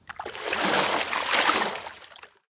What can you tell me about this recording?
Water slosh spashing-7
environmental-sounds-research, splash, water